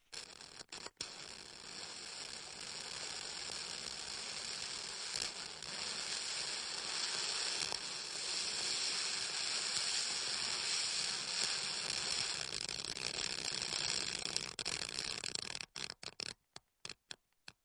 Queneau grat 14
Grattements, règle, piezo